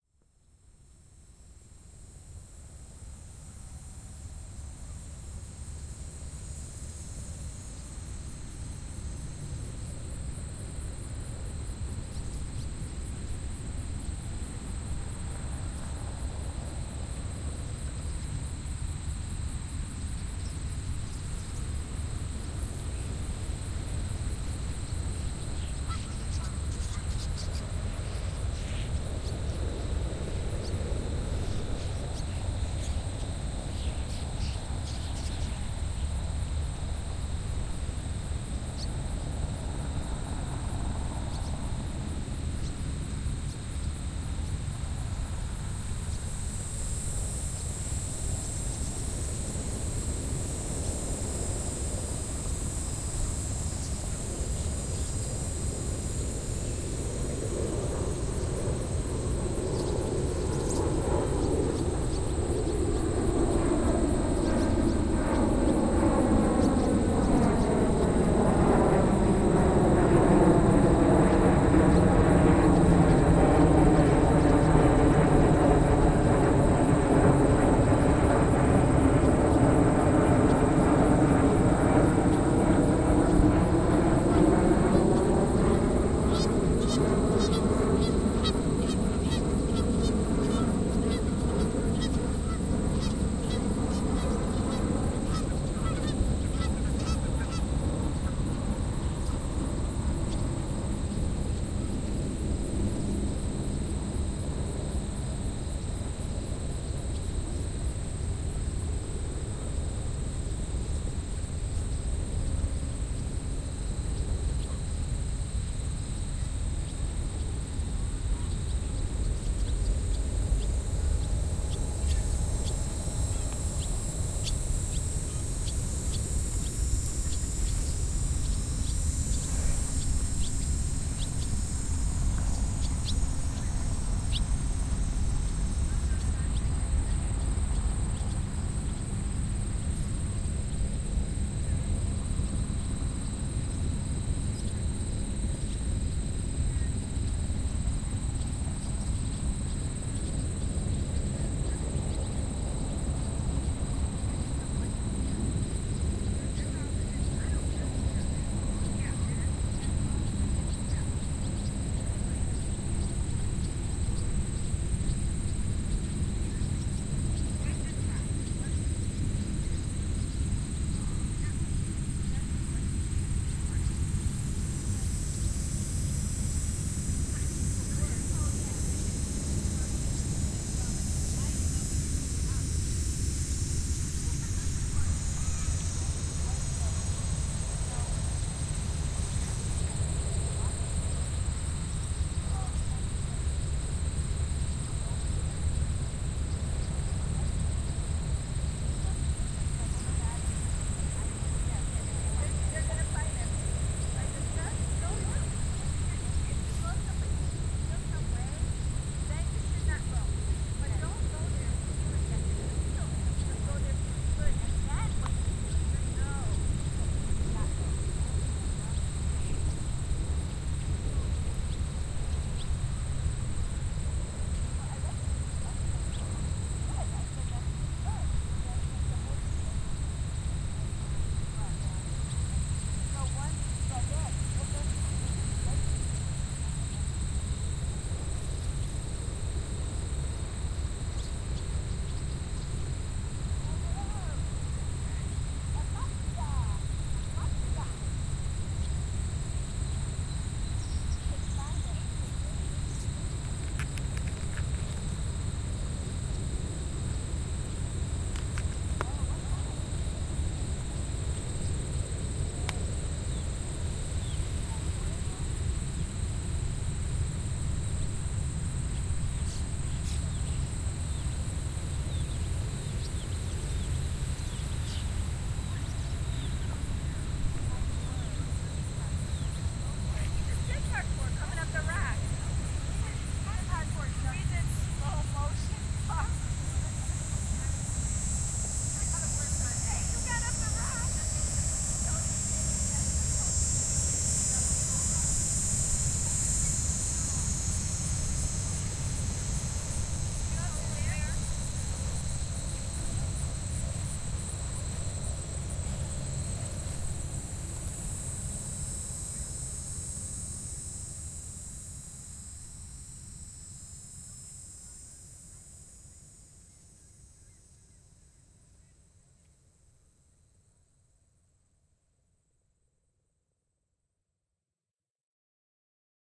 FR.WindyCityTrip.17
soundscapes at hammerklavier's neighborhood